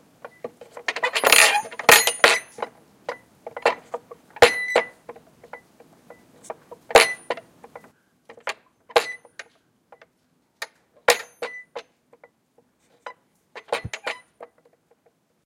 Cabin hook swung against a wooden door. Recorded inside a large wooden goahti at Ylläskaltio hotel in Äkäslompolo, Finland.